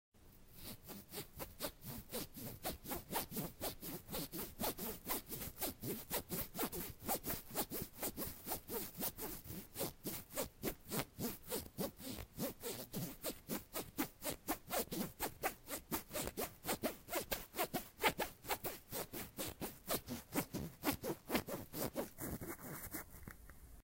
Sound of saw.